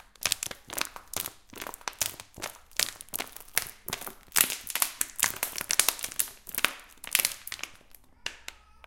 Sonic Snap Sint-Laurens
Field recordings from Sint-Laurens school in Sint-Kruis-Winkel (Belgium) and its surroundings, made by the students of 3th and 4th grade.
Sonic, Snap, Sint-Laurens, Belgium, Ghent, Sint-Kruis-Winkel